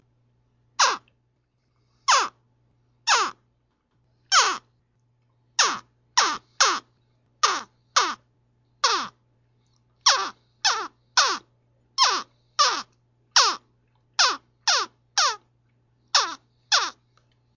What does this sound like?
I was goofing off with a little straw and found that it reminded me of a baby alligator. It's close, but not exact. you may have to speed it up to get the right staccato sound to it.